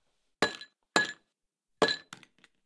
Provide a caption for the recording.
Giving walnuts into glass sound.
falling, walnut